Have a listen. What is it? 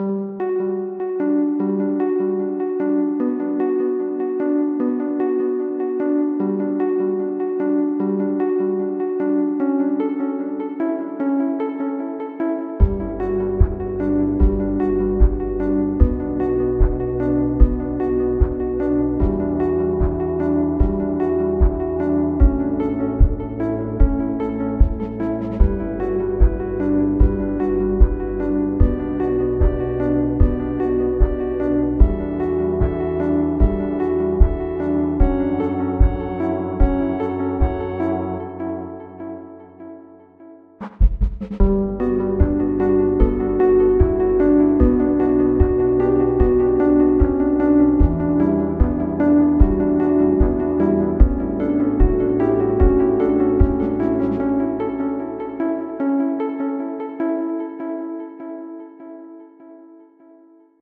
just a dream
ambient, beat, long, melancholic, melancholy, melody, music, music-box, nostalgic, sad, sequence, simple, song, strong, tune